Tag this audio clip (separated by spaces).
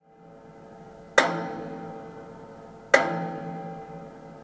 metal sustain